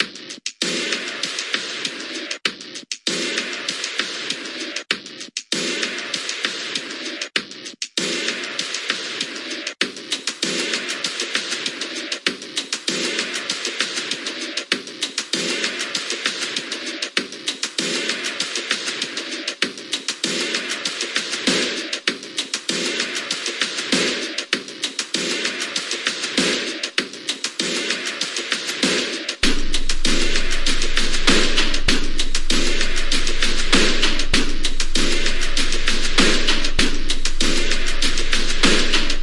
INDUSTRIAL BEAT
beat, drums, percussion-loop